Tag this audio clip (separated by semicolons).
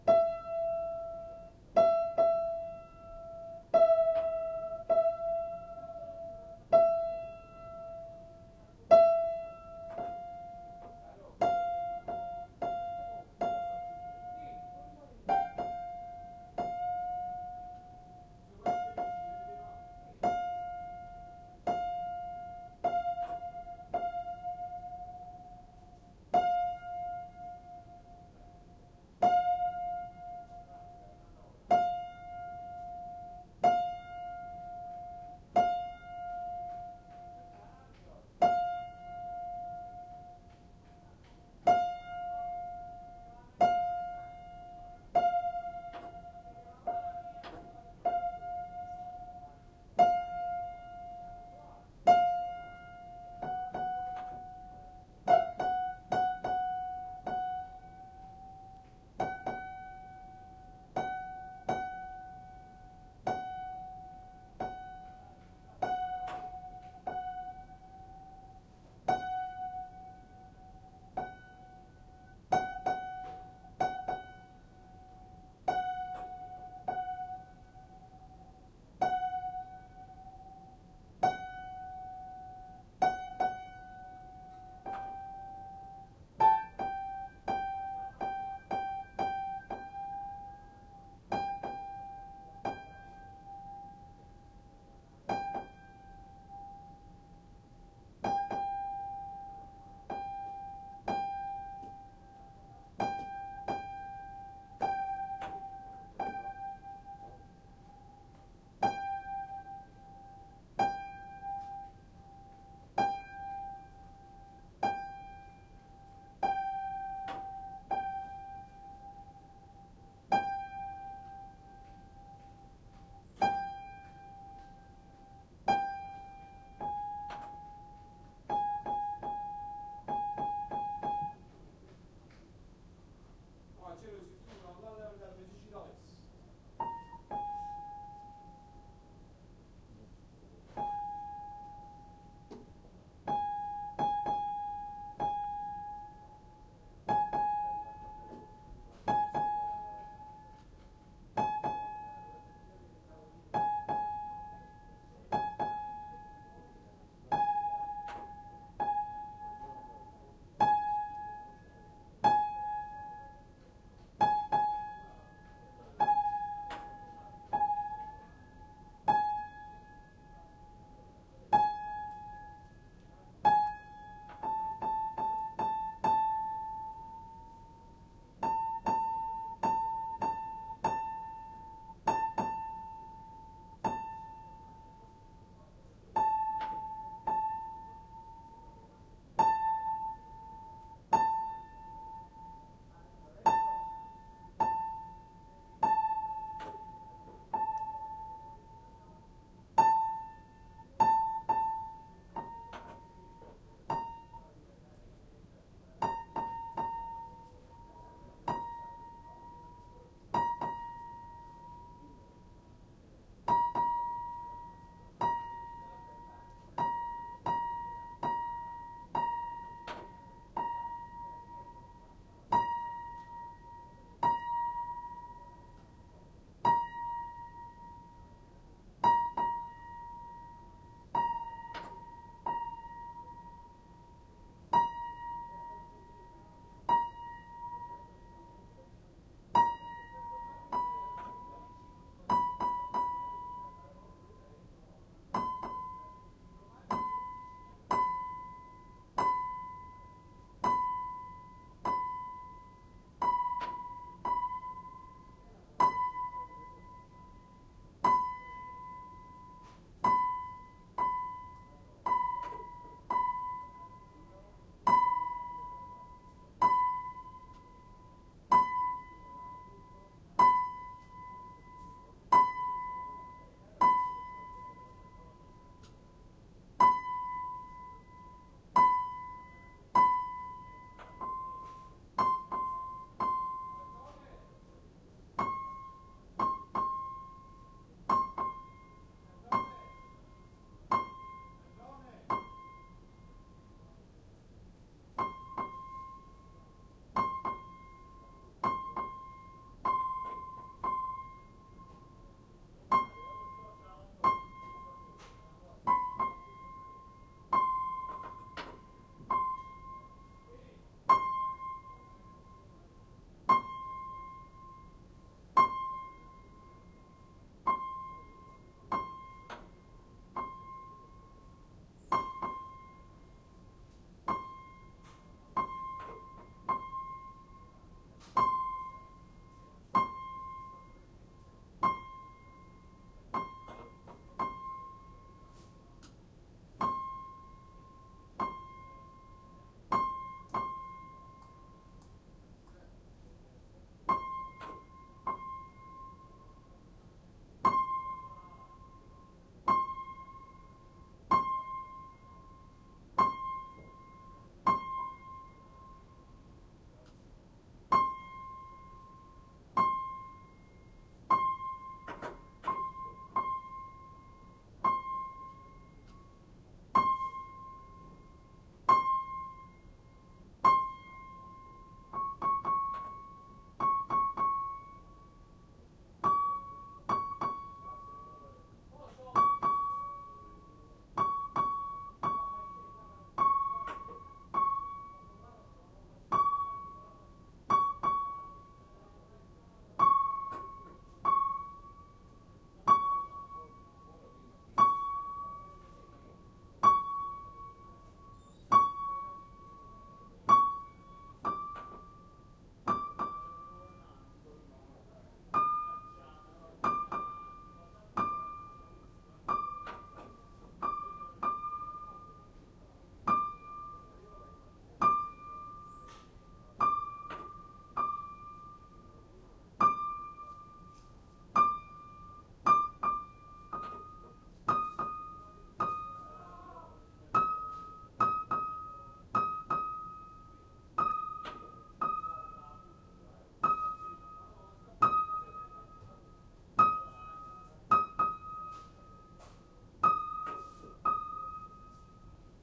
background; noise; piano; tune